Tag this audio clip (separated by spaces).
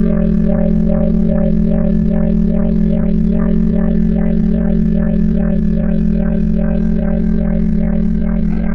spaceship space